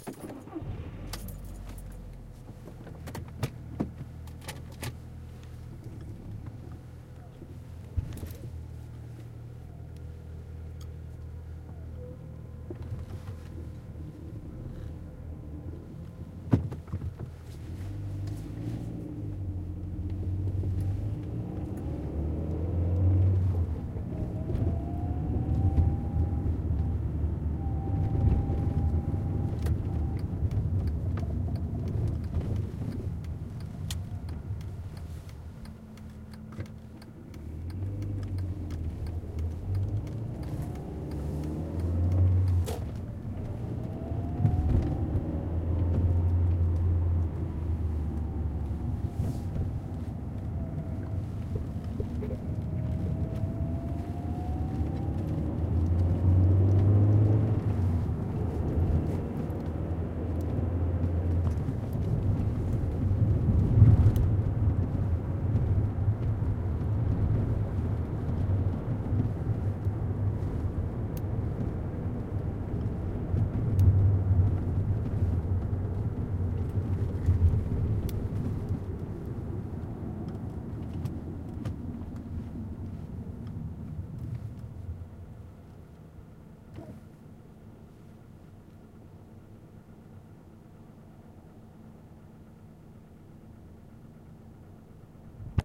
Recording of car drive. With engine start
engine, driving, audi, motor, field-recording, vehicle, car, drive, indicator, automobile, start, inside, ambient